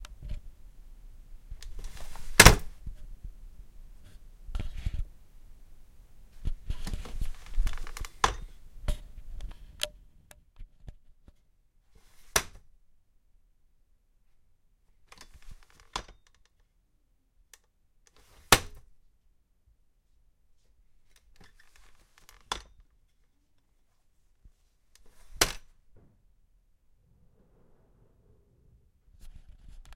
A folding chair being set up and closed. Creaks, clinks, and slams. Recorded on Zoom H1n